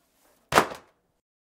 dropping books
class, intermediate, sound